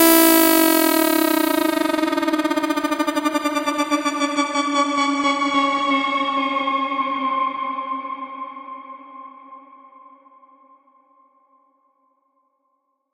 Rough Love Sweep
sweep, sweeping, sound-effect, sweeper, rising, fx, effect, riser